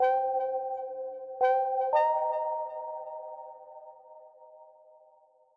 BOC to Work 2
drum and bass synth loop dnb 170 BPM key C